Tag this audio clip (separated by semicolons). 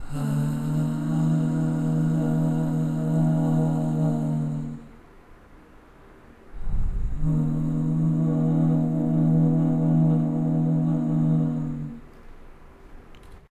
acappella; voice; sing; vocal-stem; male; singing; harmony; acapella; sample; vocal-sample; human; male-vocal; vocals; a-cappella; foreboding; vocal; a-capella